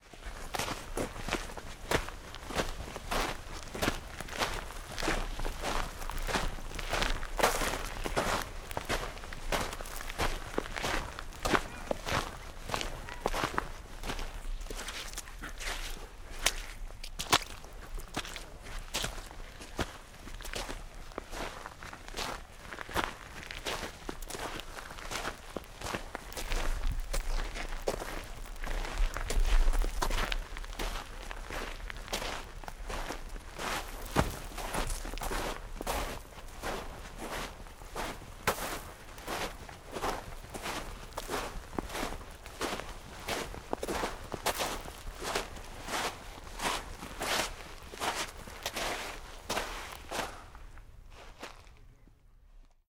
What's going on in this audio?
footsteps in wet coarse sand, close up, some steps in water. Little low frequency boom noise should be remove.
France, 2009
recorded with schoeps CMC6 mk41
recorded on soundddevice 744T
foot, footstep, footsteps, sand, step, steps, walk, walking, walks, wet